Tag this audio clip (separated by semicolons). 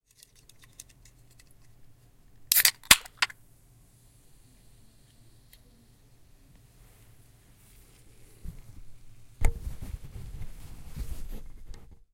crunch; mic; movement; transient; microphone; crush; field-recording; struck; hands; dreamlike; sound-design; percussive; foley; compact